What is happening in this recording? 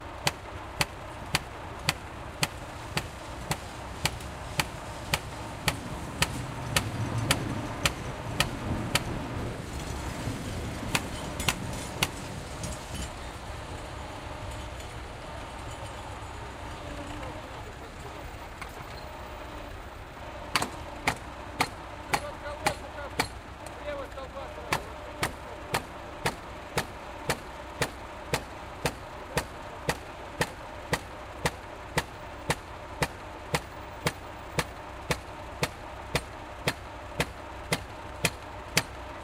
tractor-pneumo-hammer1
Tractor with pneumo hammer hits the frozen ground. Workers wait near.
Recorded: 19.01.2013
repair, noise, costruction, clatter, pneumo, pneumo-hammer, town, rumble, hammer, city, tractor, mechanical